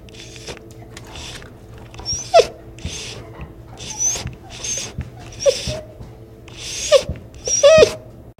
Dog Whine 2
whining, animal, whine, dog